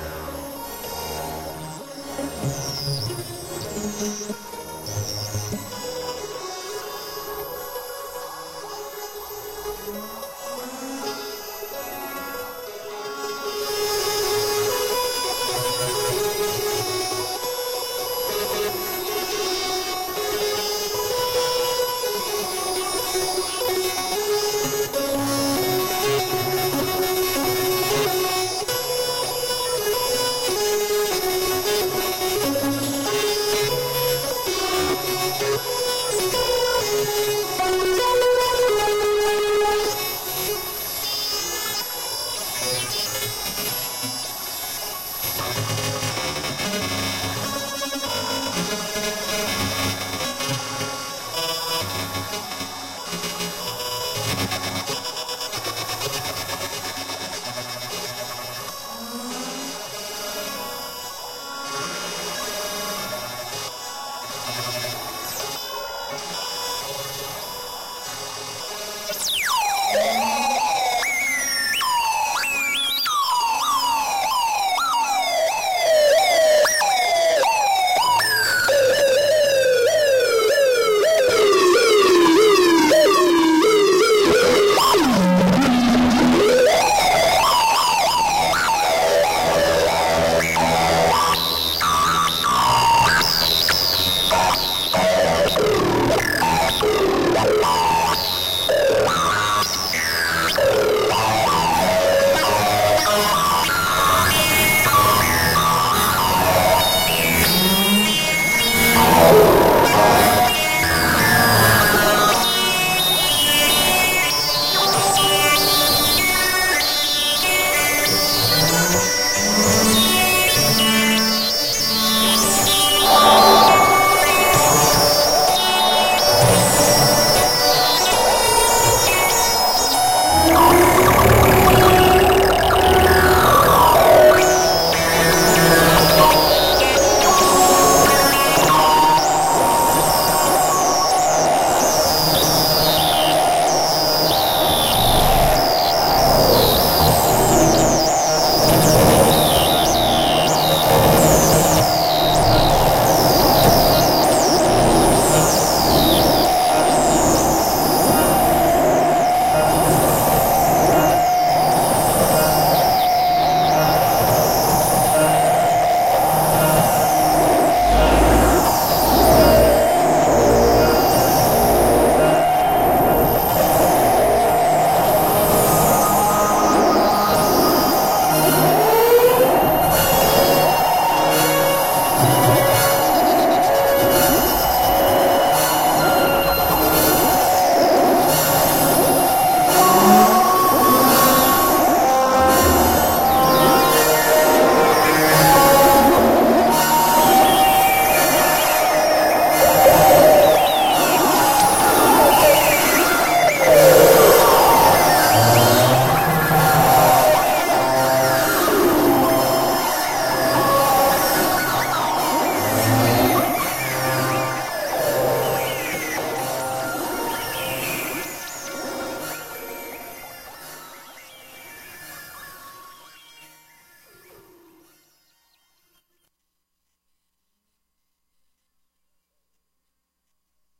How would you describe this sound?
using a frequency filter by setting its Oscillations in variable arrays I was able to make most of these sounds. Also vocoding and feedbacks and feed throughs were happening. then they were altered in audacity.